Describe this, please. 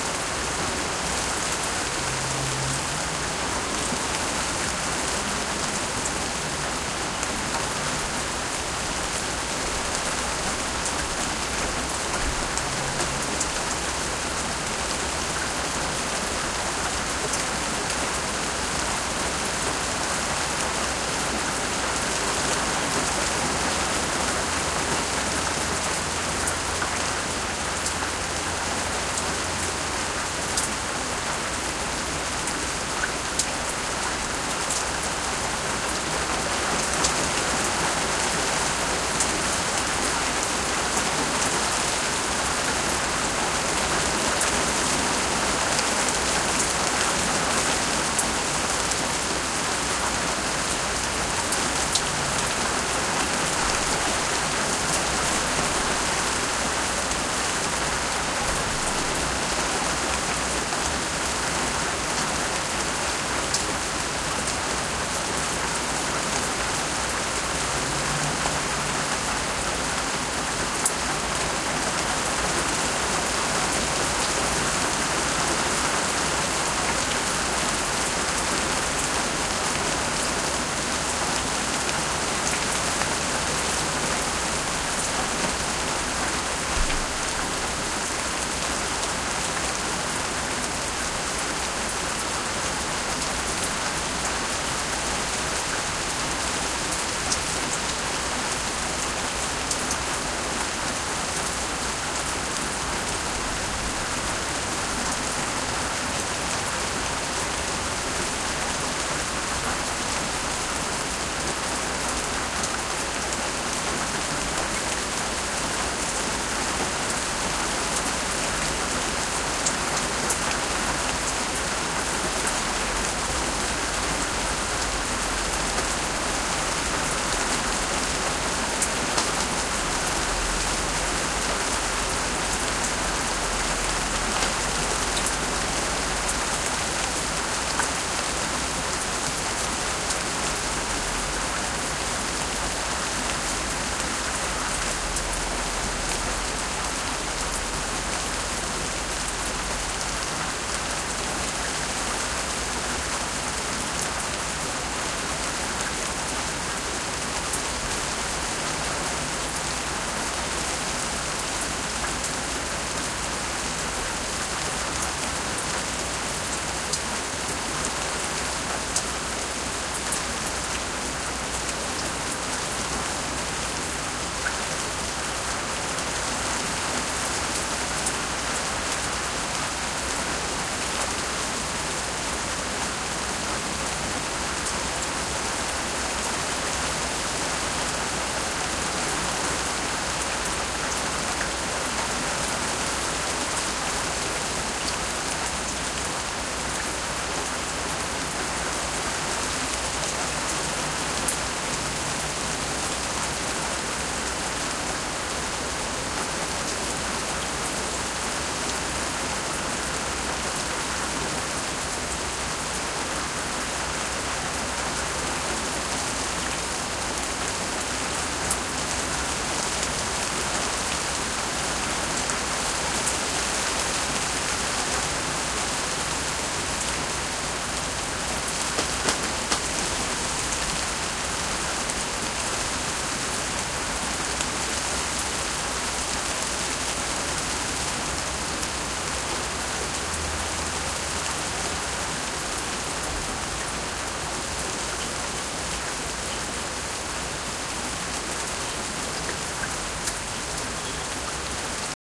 RAIN NON-STREET 5-22-2013
Moderately heavy late night rainstorm recorded 22 May 2013 outside my house in Beaverton, Oregon. Character is general background rain in plants and bushes, with a lot of close-field sound near the mic. Intensity of rain ebbs and flows throughout clip
Recorded with Canon T4i DSLR & PolarPro stereo mic. Track stripped out with Quicktime Pro.